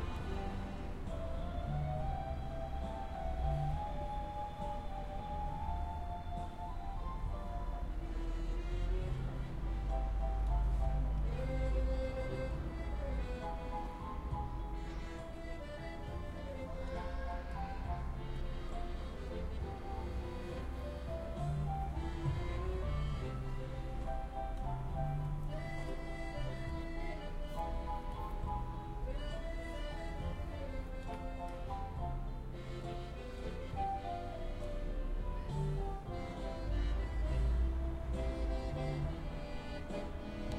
STE-001-accordion lisbon streets
A street musician playing accordion in Lisbon.
city, field-recording, lisbon, music, street